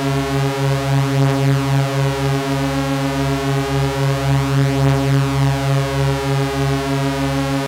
Technosaurus layered saws
Loopable lead sound from my Technosaurus Microcon, obtained by multi tracking 3 slightly detuned and lightly off phase saw waves.
12 pole filter, fully opened, no resonance. Played in gate-trigger mode (no adsr whatsoever).
Recorded directly into Audacity through my Macbook internal soundcard.
technosaurus, microcon, sawtooth, layer, analog-synth, rhythm, multi-track, loop, lead